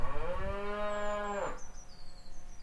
Cow moo #3
A nearby moo.
cattle, countryside, cow, cows, farm, farm-animals, lowing, moo, mooing